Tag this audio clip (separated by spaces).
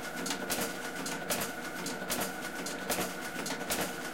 factory industrial loop machine machinery office plant print sfx